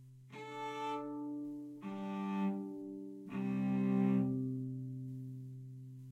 cello opennotes harmonics doublestops
A real cello playing open string harmonics as double-stops (two strings bowed at the same time). Recorded with Blue Yeti (stereo, no gain) and Audacity.
harmonics, classical, instrument, cello, strings, violoncello, open-strings, stringed-instrument, string, double-stops